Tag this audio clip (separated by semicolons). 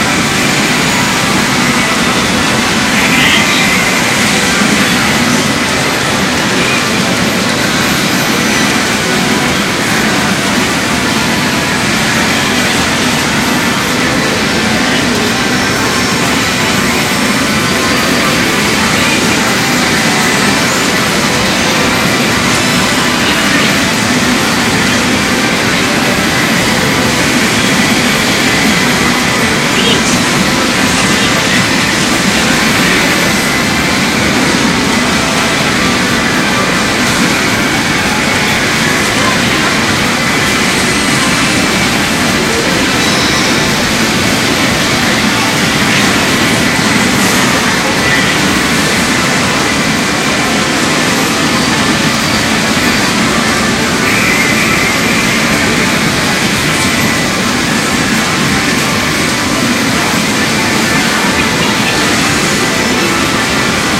music; noize; ginza; 2010; color